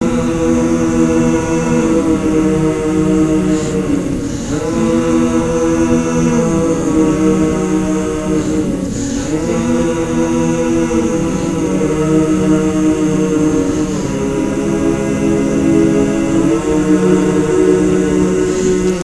A collection of samples/loops intended for personal and commercial music production. All compositions where written and performed by Chris S. Bacon on Home Sick Recordings. Take things, shake things, make things.
acapella, acoustic-guitar, bass, beat, drum-beat, drums, Folk, free, guitar, harmony, indie, Indie-folk, loop, looping, loops, melody, original-music, percussion, piano, rock, samples, sounds, synth, vocal-loops, voice, whistle